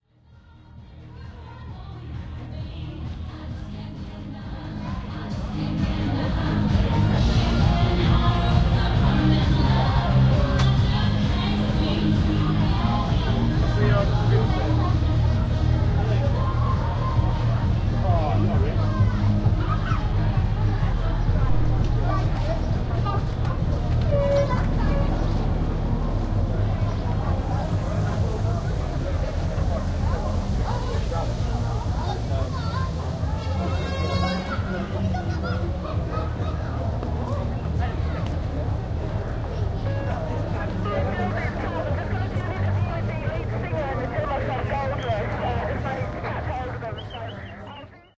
STREET AMBIENCE 3
This is three of three stereo images that I captured on the 24th May in Market Weighton in East Yorkshire at an annual village event called "The Giant Bradley Day". It was a very hot day and I wandered up and down the crowded main street amongst stalls, food sellers and children's entertainers.
ambient, yorkshire, summer, happy, crowd, street, fete, fayre, people